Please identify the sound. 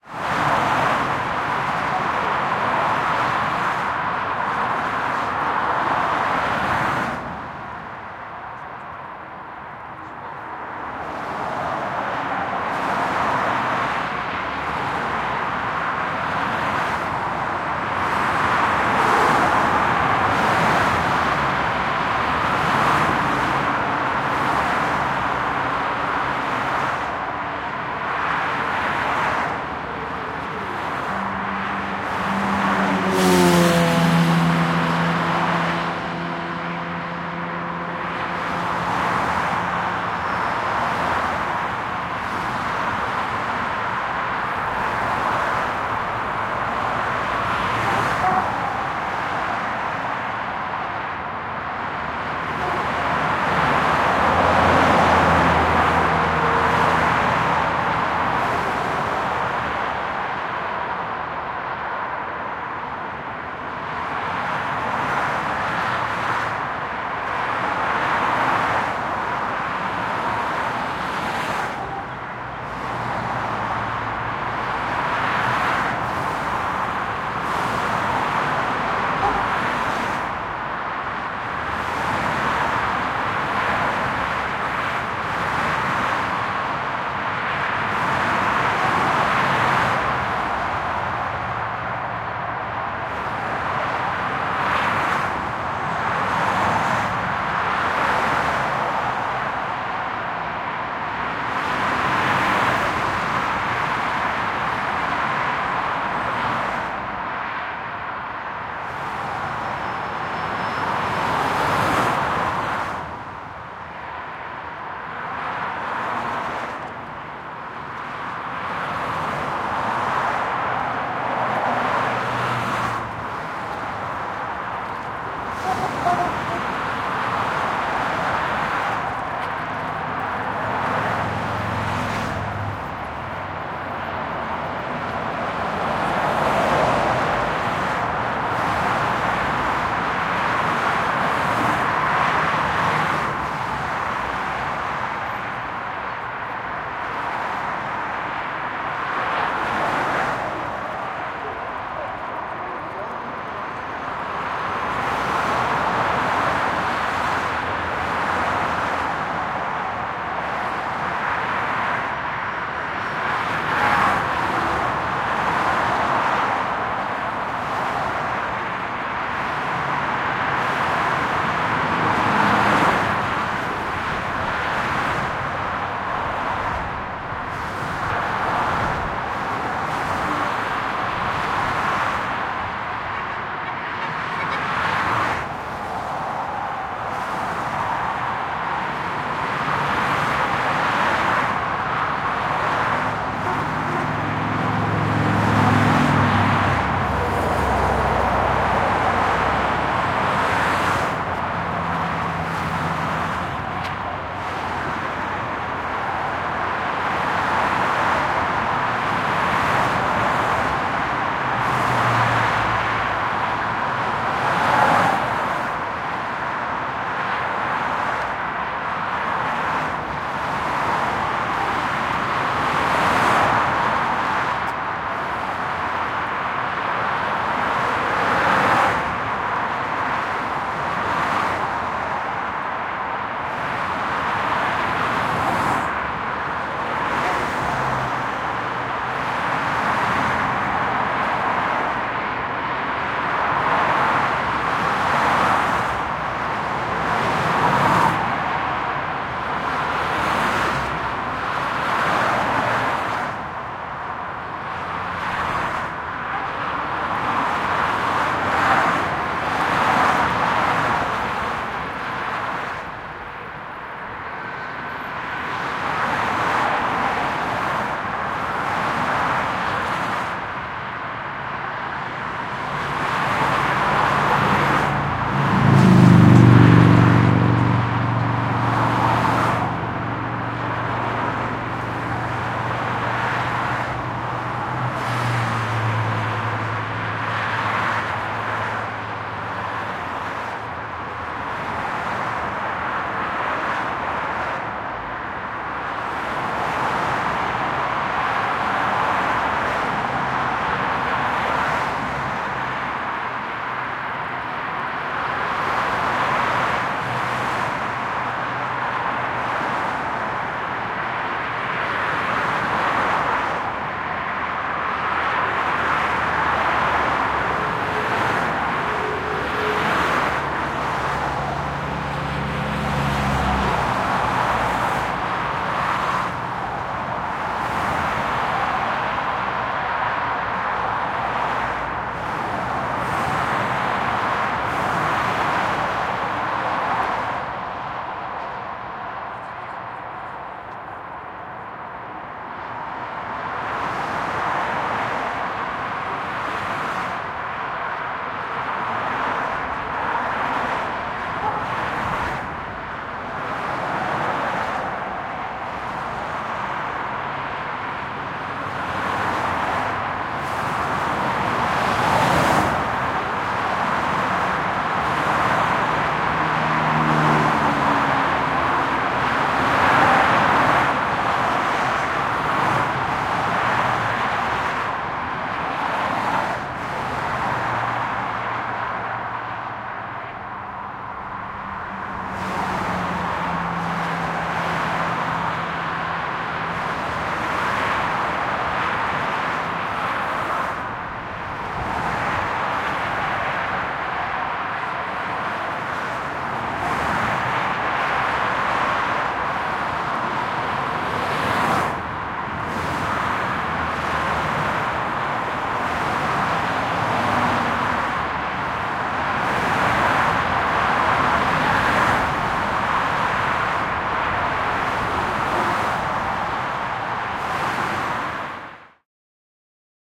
Highway Regular traffic (medium distance)
Regular traffic on Brussels’ Ring, recorded from a bridge on a Sunday afternoon.
120 degrees stereo (Sony PCM D100)